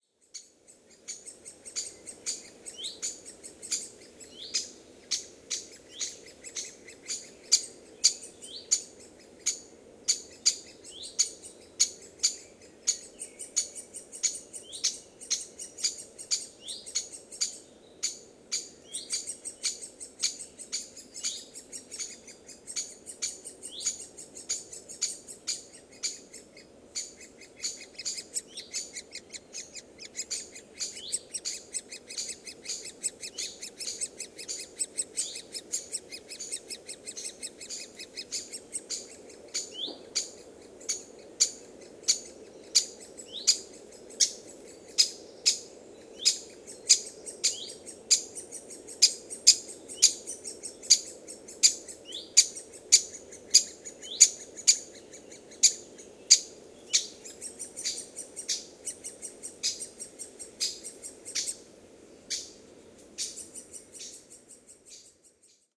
1138woodpecker juvenile adult2
Great spotted woodpecker (Dendrocopos major) juveniles sitting in their nest hole in a tree, calling for their parents to be feeded. Adult woodpecker flying around, giving warning calls, because I'm very close to the nest entrance. Vivanco EM35 on parabolic dish with preamp into Marantz PMD 671.
breeding, nest, chicks, juvenile, call, woodpecker, birds